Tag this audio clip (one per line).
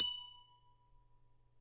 glas
instrument
sine-like
tone
water